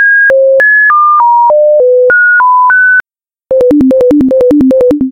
GLISIC Marijana 2020 2021 telephone
At the beginning, I added short tones with frequencies ranging from 300 hz to 1700 hz to make the sounds of the composition of a number then
I added 4 frequencies starting from the highest with 523,26 hz and 496,69 hz to the lowest with 294,33 hz and 261,63 hz that I copied and pasted 4 times. At each first frequency I added reverb and at the last one I added echo to give the impression of a telephone ringtone
I added a closing fondue.
call, composing, number, phone, ring, telephone, tone